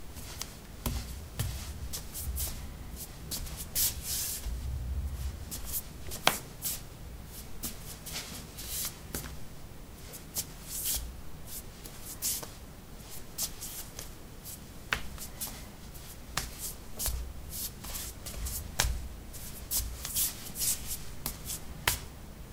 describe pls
ceramic 01b barefeet shuffle tap
Shuffling on ceramic tiles: bare feet. Recorded with a ZOOM H2 in a bathroom of a house, normalized with Audacity.
footsteps, footstep, steps